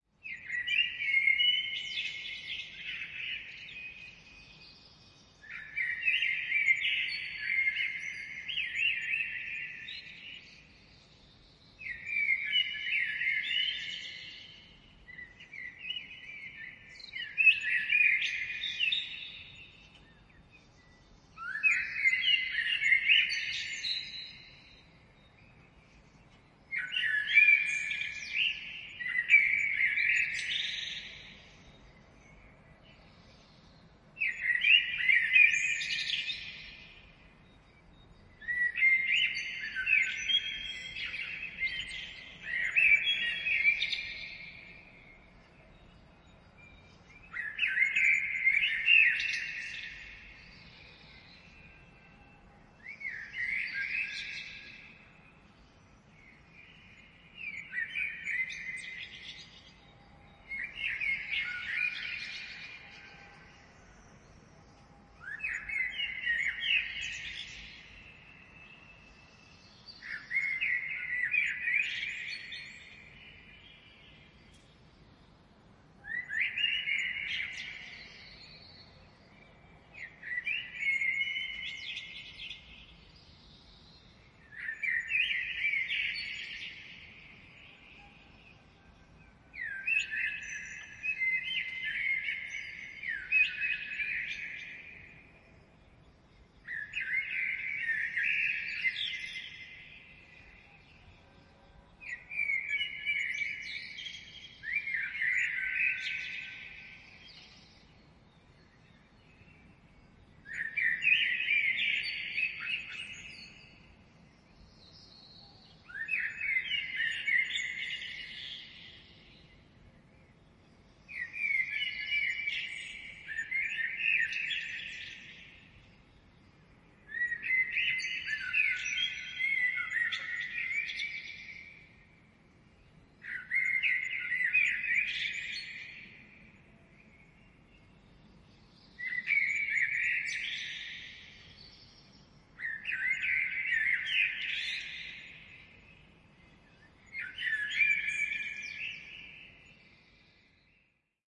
633 excerpt AB BIRDS: Common blackbird 4am in May
Recording of a common blackbird (supposedly) at dawn (around 4 a.m.) on a concrete neighbourhood (causing natural reverb/delays). In the background, you can hear other, a bit more distant birds mixed with the reflections of the main soloist.
You may find a few similar recordings of early morning blackbird, but this one should definitely have the best quality.
Recorded on Sound Devices MixPre-6 II in AB stereo with matched pair of Sennheiser MKH8020 placed on an 85cm wide stereo bar.
p.s.
if you fancy comparison - with this session I've recorded additional mono channel in the middle, made with Octava MK-012 (super-cardioid capsule) - the difference is astounding, so however it is probably not totally scientific test, I'm happy to share it with sound gear geeks.
dawnchorus, bird, birdsong, blackbird, common-blackbird, nature